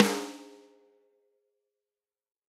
PearlVinniePaulSnakeskinSnare14x8Rimshot
Vinnie Paul custom 14x8 inch snare drum by Pearl.
acoustic, stereo, drums